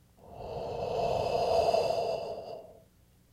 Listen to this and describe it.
A single breath out
Recorded with AKG condenser microphone M-Audio Delta AP